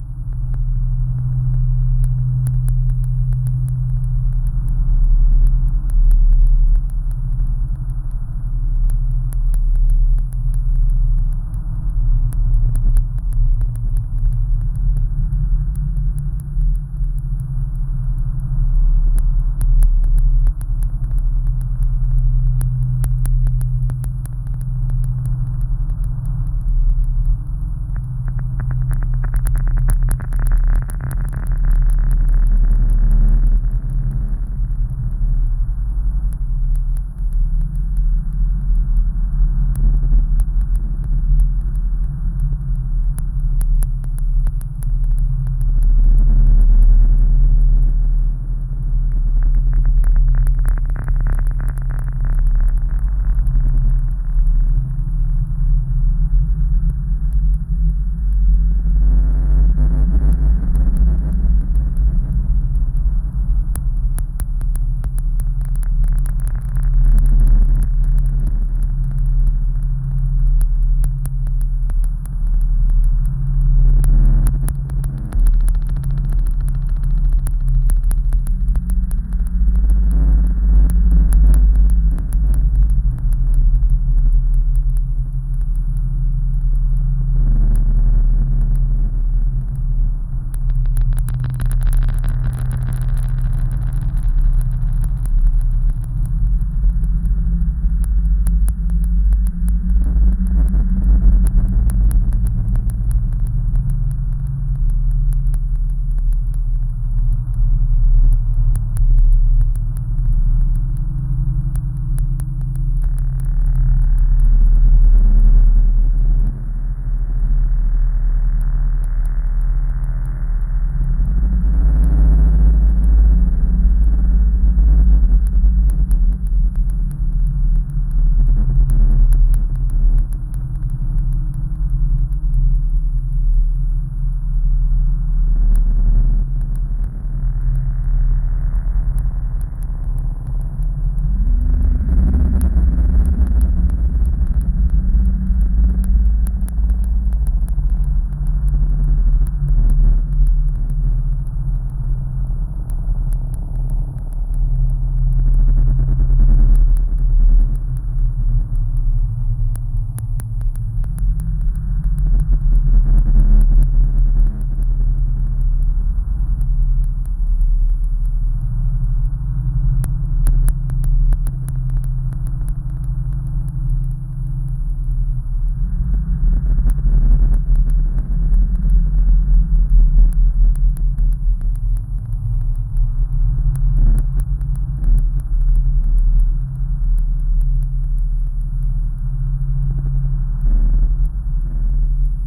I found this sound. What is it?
Bass shape made with a 3 OSC.
Fx filters: Echo, reverb.
"Crisp" effects are my current ASIO bugs with gives a special effect.